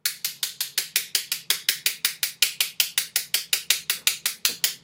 YAQINE - BIABIANY - 2018 - 2019 - SON 4wav
noise with a pen using the application